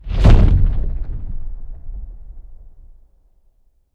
Cinematic Woosh SFX-014
Cinematic Woosh effect,is perfect for cinematic uses,video games.
Effects recorded from the field.
Recording gear-Zoom h6 and Microphone - RØDE NTG5
REAPER DAW - audio processing
sweep, stinger, hit, sound, riser, deep, trailer, logo, effect, tension, industrial, indent, reveal, swoosh, impact, boom, movement, sub, game, metal, gameplay, cinematic, thud, implosion, transition, video, explosion, bass